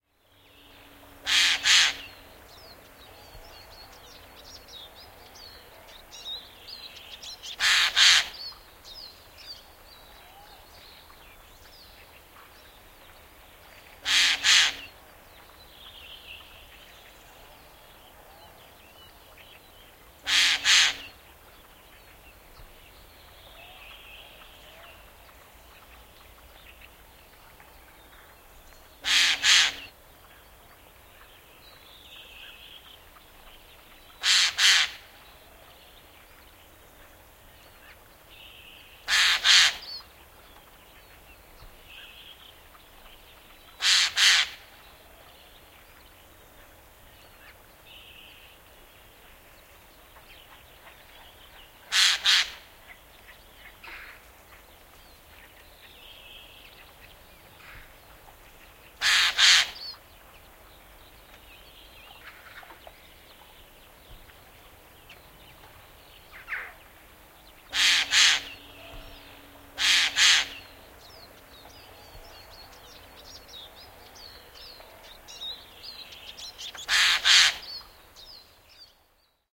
Närhi raakkuu, kevät / A jay croaking in the forest, other birds in the bg
Närhi ääntelee, metsä. Taustalla muita lintuja.
Paikka/Place: Suomi / Finland / Raasepori, Snappertuna
Aika/Date: 17.04.1999
Bird, Field-Recording, Finland, Finnish-Broadcasting-Company, Forest, Linnut, Lintu, Luonto, Nature, Soundfx, Spring, Tehosteet, Yle